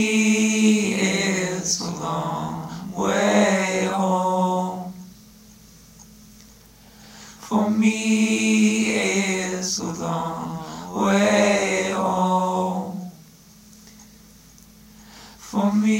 LONG WAY HOME Vocals
A collection of samples/loops intended for personal and commercial music production. All compositions where written and performed by Chris S. Bacon on Home Sick Recordings. Take things, shake things, make things.
bass, percussion, beat, free, looping, Indie-folk, Folk, vocal-loops, loops, piano, original-music, acoustic-guitar, harmony, indie, rock, sounds, drums, samples, voice, whistle, acapella, drum-beat, guitar, loop, synth, melody